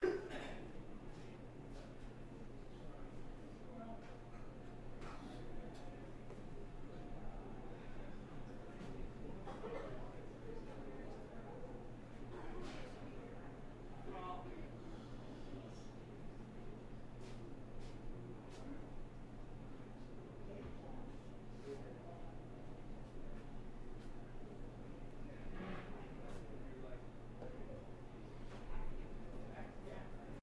restaurant room tone
Room tone of a medium sided restaurant.
ambience, ambient, background, background-sound, general-noise, restaurant, room, tone